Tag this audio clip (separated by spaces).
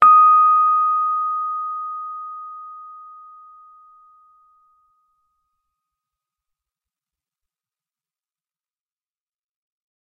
electric fender keyboard multisample piano rhodes tine tube